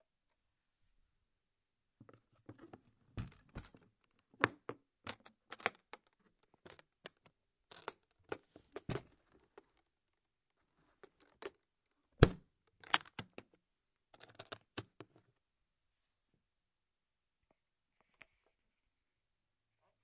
Opening and closing a briefcase